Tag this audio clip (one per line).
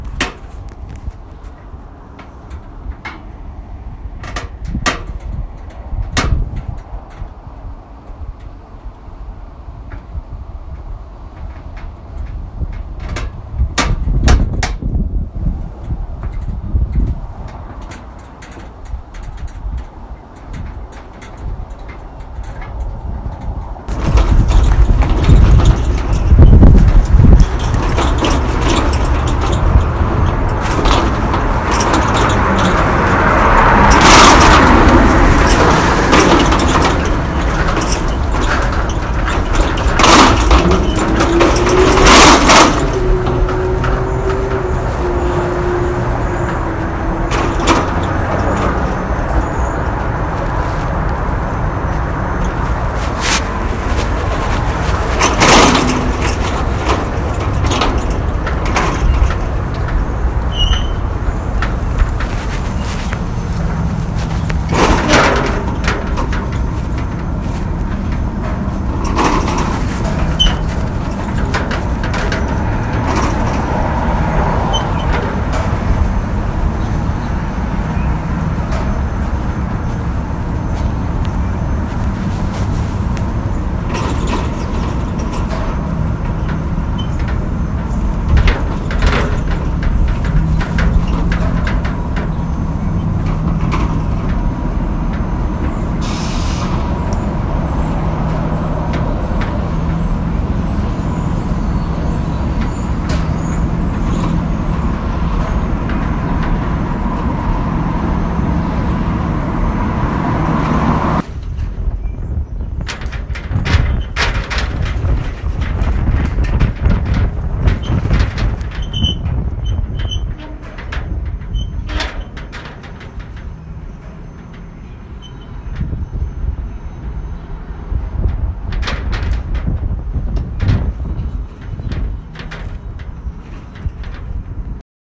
squeaky
metal
field-recording
creak
wind
gate
door
creaky
metallic
squeak